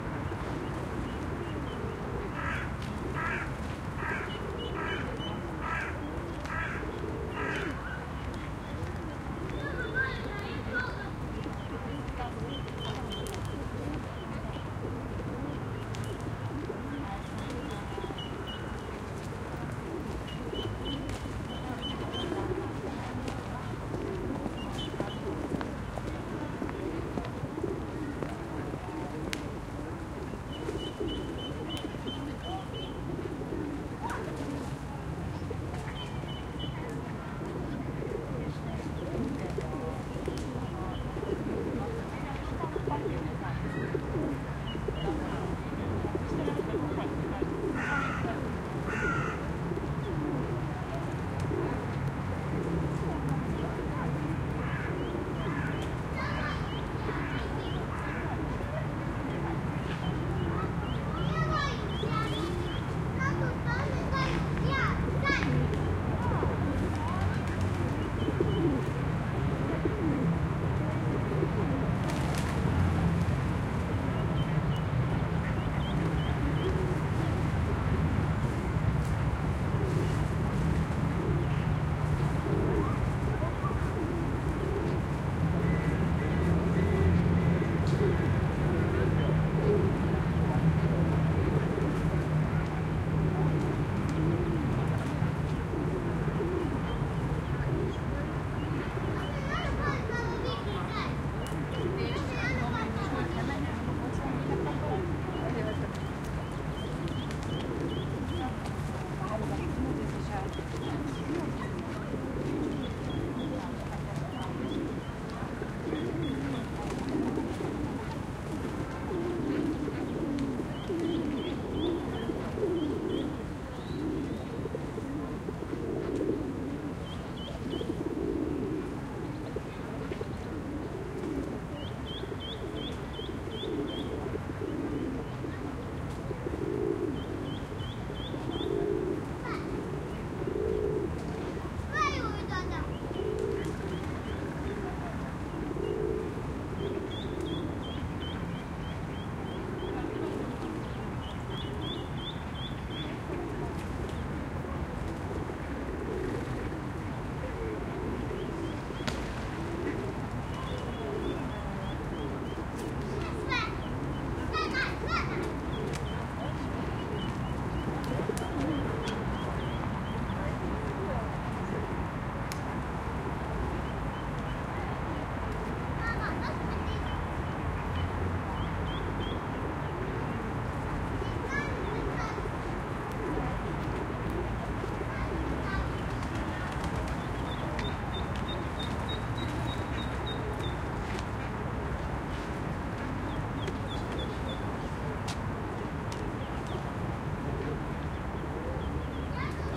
Ambience recording in the Stadtpark in Vienna, Austria. There are a lot of pigeons around, some crows and ducks. People are walking and there is traffic near the park.
Recorded with the Zoom H4n.